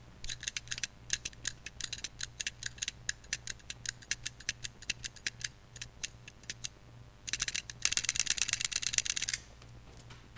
Me shaking a pedometer (human stride counting device) at different rates. Separate and splice as needed for all those gripping hollywood action scenes in which the hero or villain has a step counter on.